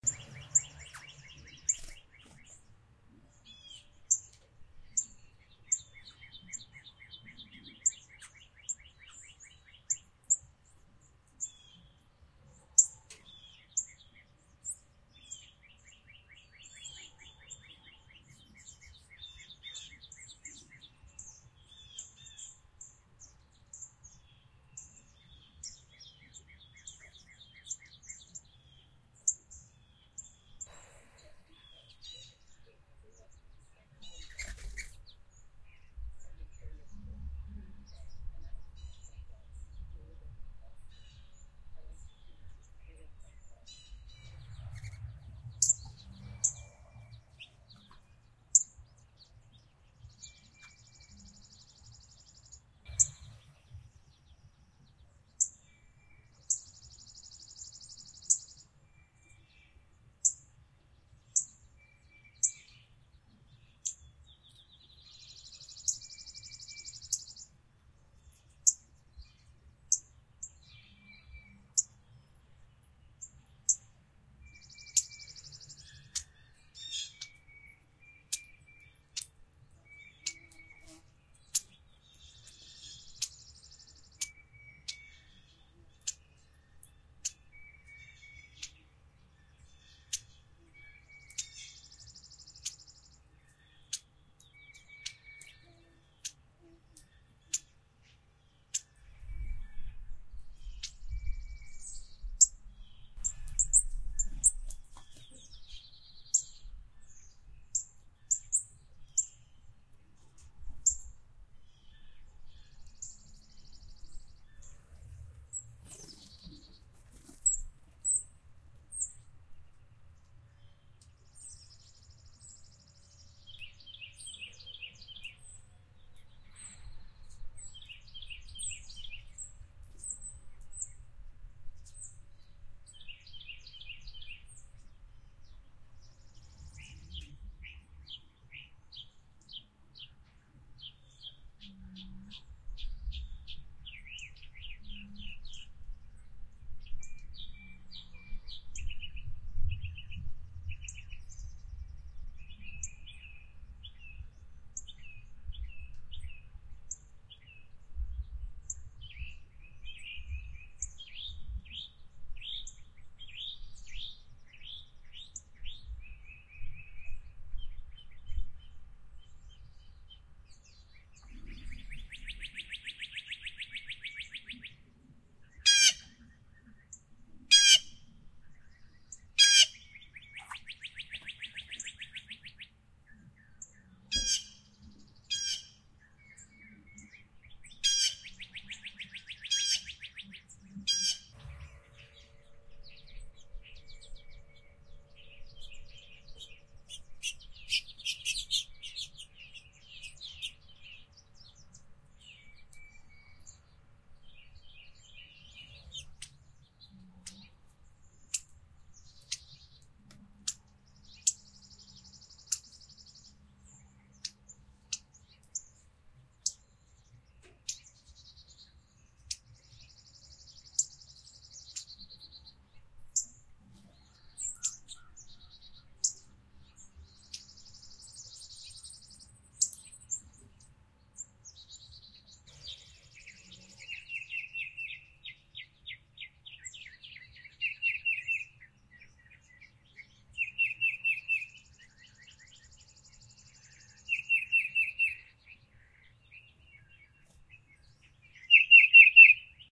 Mid Atlantic US Spring Birds
Variety of East Coast US birds recorded in Spring. Birds at various distances from the mic and a variety of species.
Birds, Birdsong, Environment, Morning, nature